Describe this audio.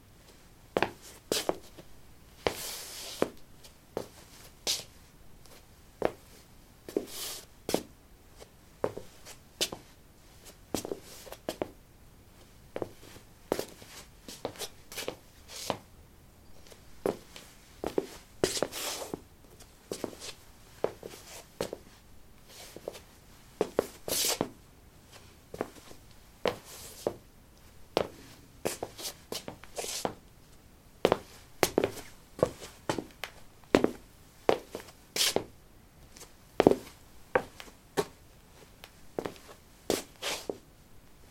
lino 10b startassneakers shuffle threshold
Shuffling on linoleum: low sneakers. Recorded with a ZOOM H2 in a basement of a house, normalized with Audacity.
footstep, footsteps, step, steps, walk, walking